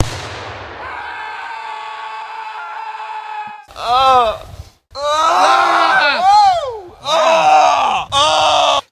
Moans and screams of agony of military soldiers
Simulated male solider screams of pain.